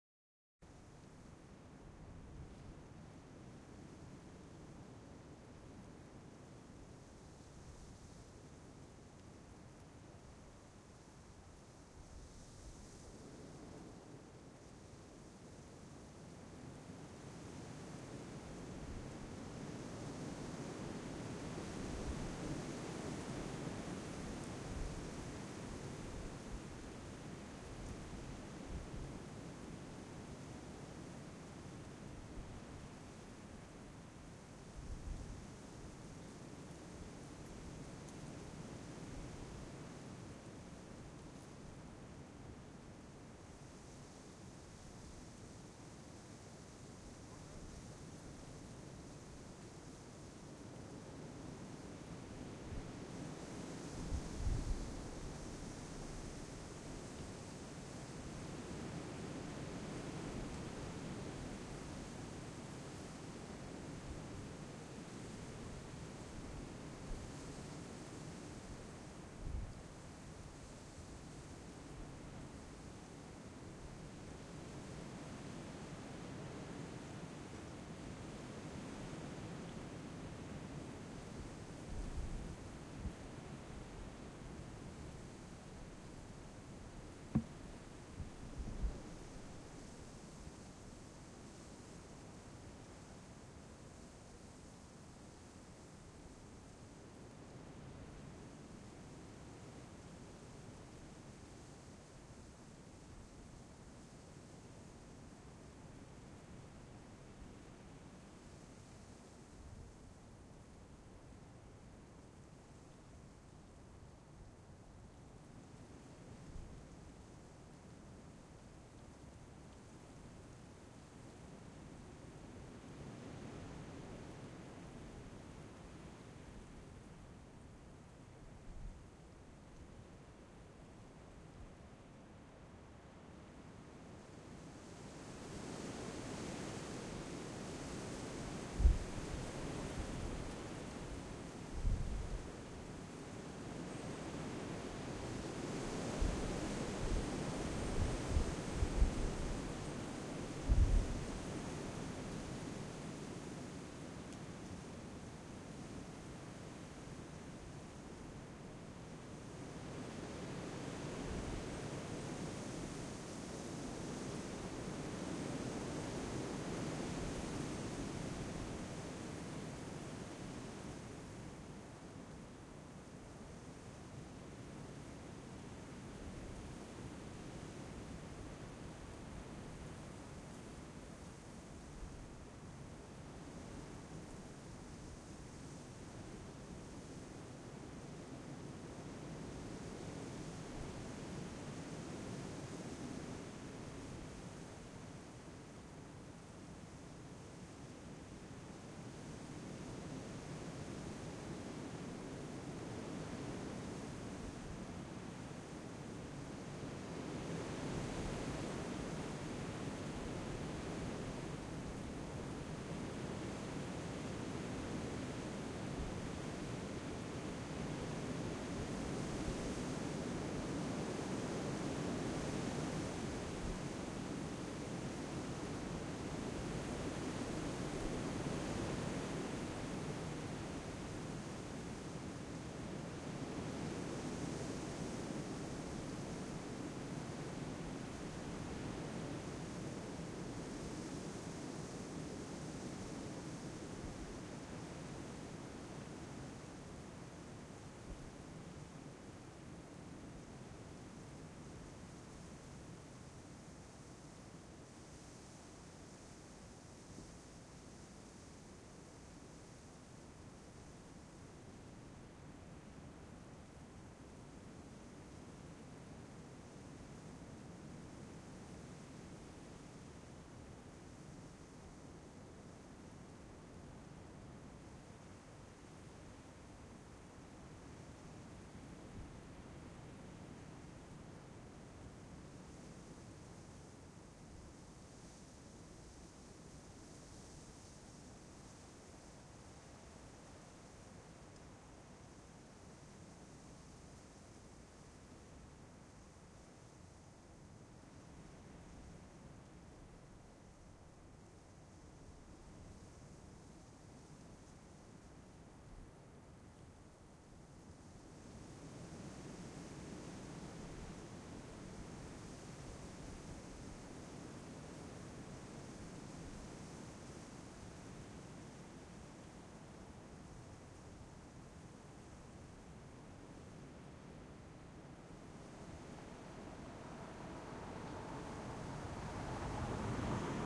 Recorded behind a car, wind is going up and down.. some handnoise and background chitchat.